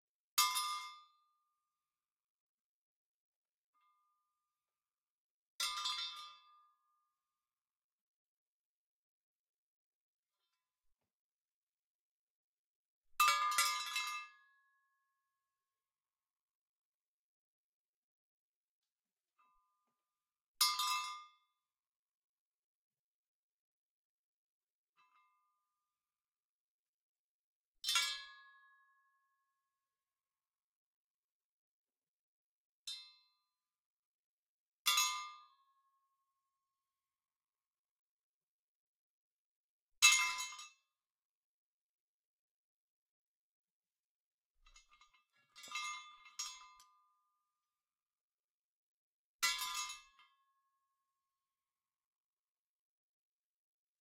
Metal stick drops on steel wheel
Metal stick drops on a steel wheel
wheel, impact, drops, hit, metal, metallic, strike, drop